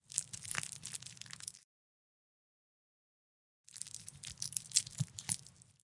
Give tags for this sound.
blood murder horror knife atmosphere pain

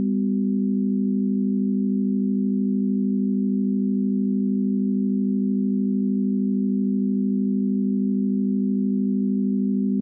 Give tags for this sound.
chord pythagorean ratio signal test

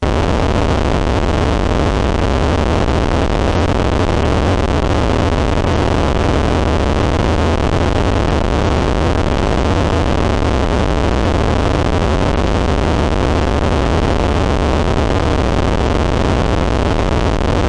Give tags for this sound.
8bit bass beep computer pcspeaker random sound

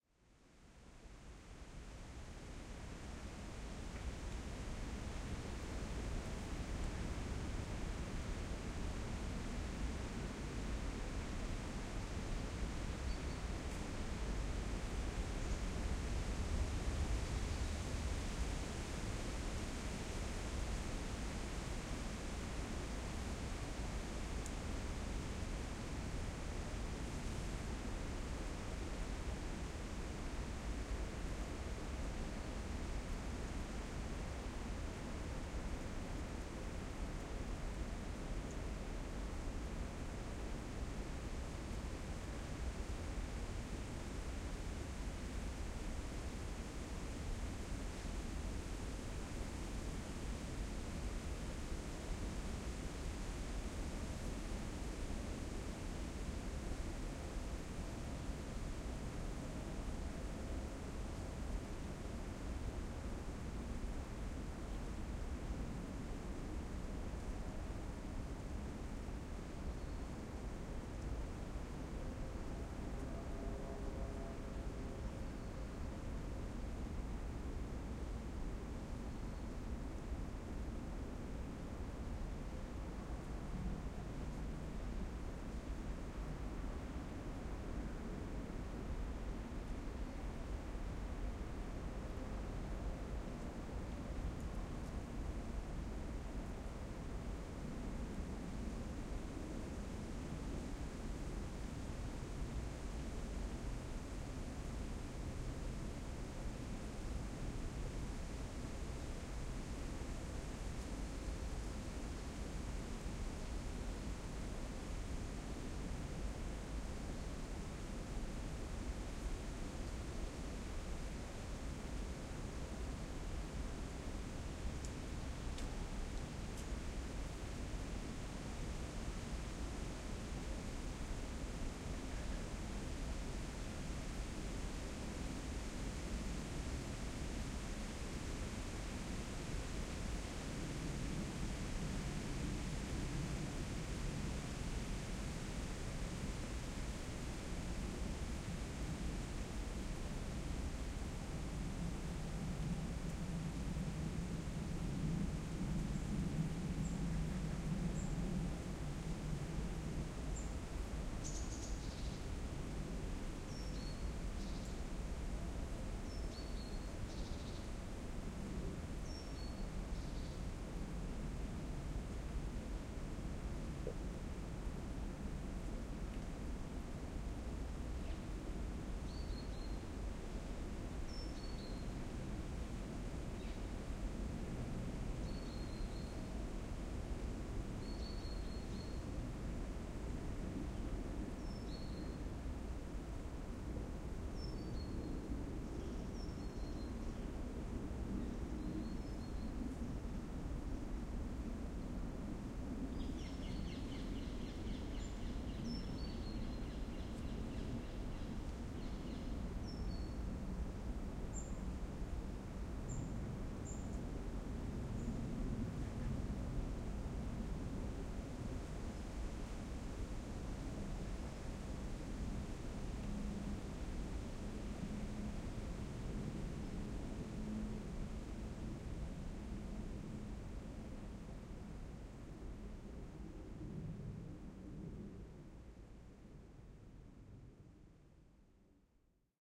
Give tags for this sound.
ambience atmosphere autumn binaural birds field-recording forest France gust leaves nature rustle rustling Saint-Cucufa soundscape trees white-noise wind windy woods

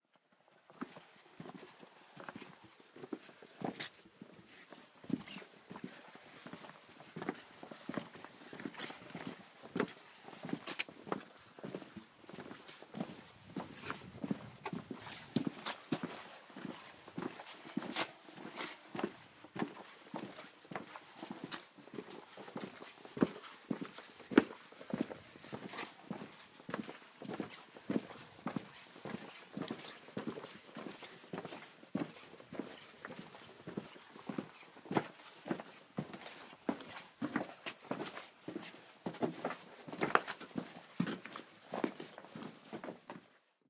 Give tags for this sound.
personn walking

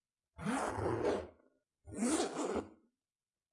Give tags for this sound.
bag ziper ziper-open

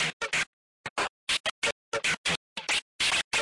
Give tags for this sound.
breakcore freaky glitch glitchbreak techno

